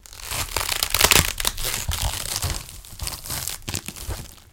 Multiple cracks 3
Some gruesome squelches, heavy impacts and random bits of foley that have been lying around.
blood, foley, gore, splat, vegtables, violent